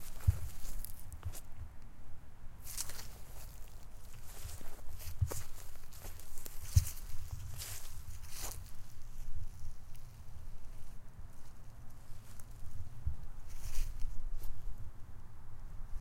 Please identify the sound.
Crunch Grass 1
Steps on some crunch grass outside in my backyard.